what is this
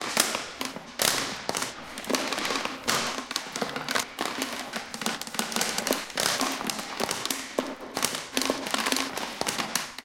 plastic bottle granulation
sound of plastic bottle being crushed, granulation applied to the original sound. Natural reverb from basement. Recorded with Zoom H1
plastic, bottle, granulation